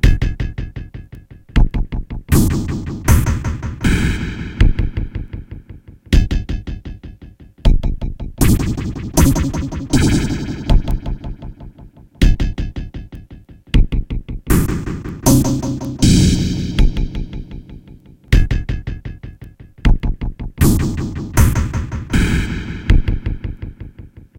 I used a circuit bent TR-707 and several outboard effects pedals to create this 40 BPM dub inflected beat.